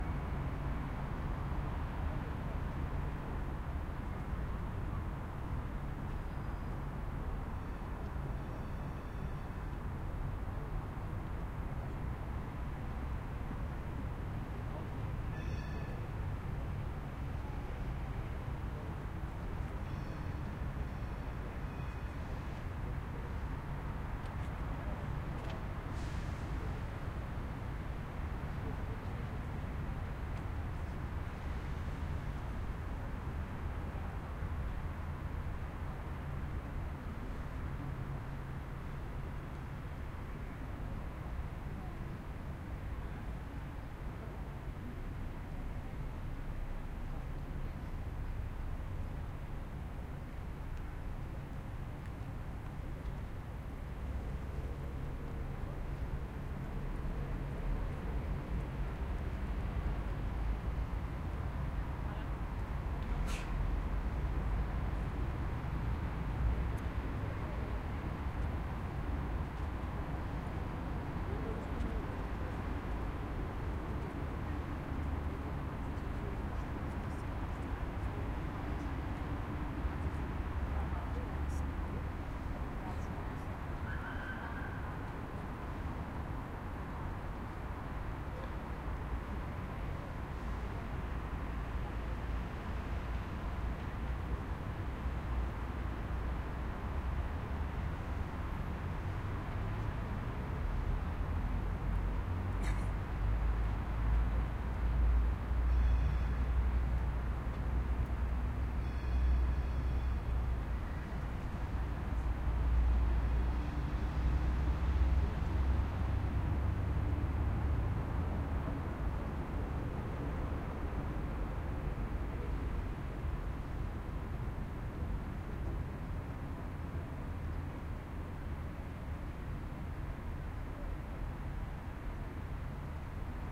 ambience Vienna MariaTheresienPlatz
Ambience recording at Maria Theresien Platz in Vienna. Traffic and people are around.
Recorded with the Zoom H4n.
field-recording, Maria, people, Platz, Theresien, traffic, Vienna